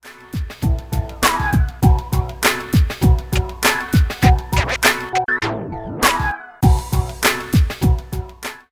Example of the sample - Scratch 'Cratchin'! 2 with a beat
This is just an example to show how that scratch sample sounds with a beat.
hip-hop, rap, acid-sized, hiphop, classic, scratch, golden-era, s, turntable, dj, scratching, 90